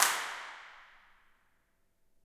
Clap at Two Church 5
Clapping in echoey spots to map the reverb. This means you can use it make your own convolution reverbs
bang, clap, convolution-reverb, impulse-response, reflections, reverb, room, spaces